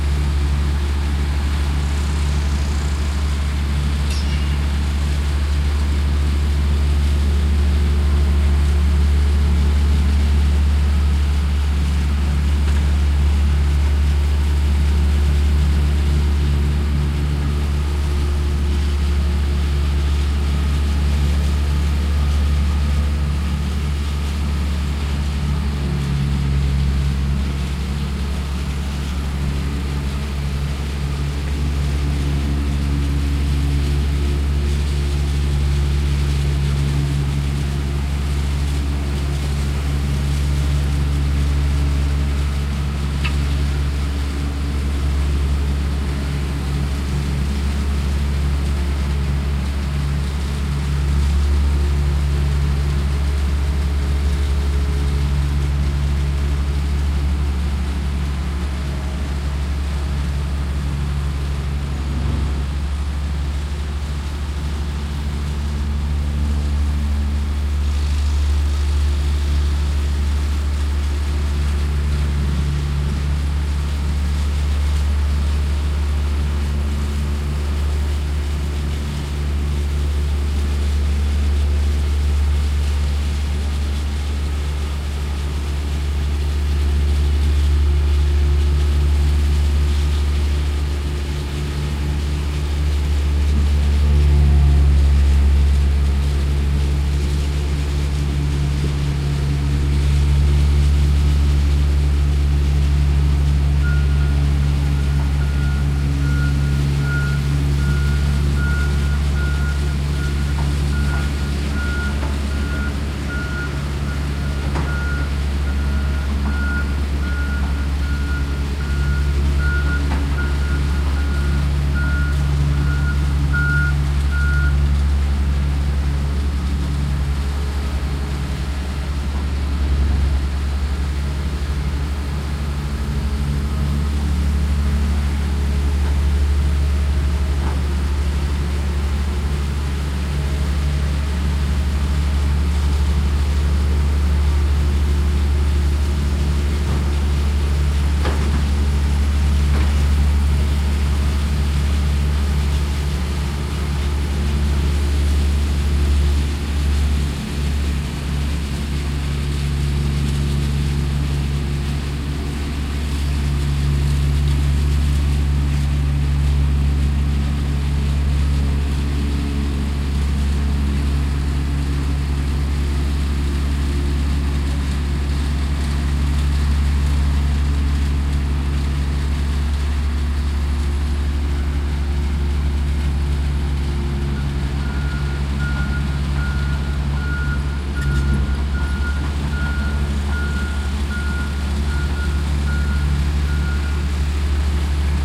AMB EXT CONSTRUCTION MEDIUM RATE LOOP
Looping ambiance of Trucks and machinery building an apartment, early state. About 5 Engines working at a medium rate. Recorded at 10-20 meters from the construction area with a Tascam Dr-40.
construction, engine, engines, machine, machinery, truck, trucks